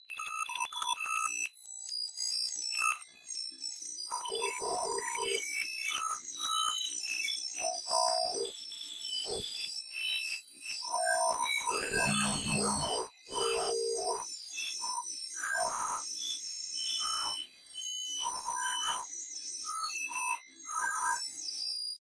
mini-glitch3
alien, annoying, computer, damage, data, digital, error, experimental, file, futuristic, glitch, laboratory, noise, noise-channel, noise-modulation, processed, random, sci-fi, sound-design